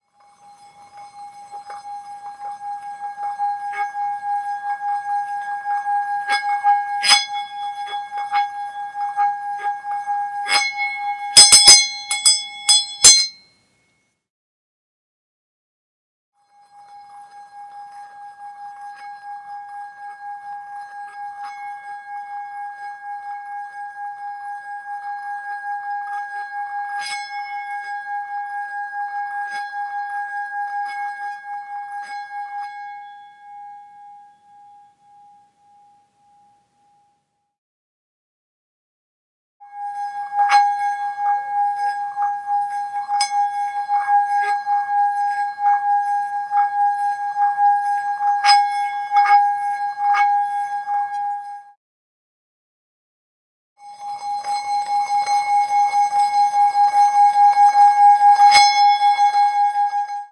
Stressed feeling
atmosphere, horror, murder, pain, Bell